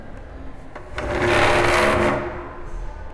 SonicSnap SASP RocBertranOriol
Field recordings from Santa Anna school (Barcelona) and its surroundings, made by the students of 5th and 6th grade.
cityrings, santa-anna, sonicsnaps, spain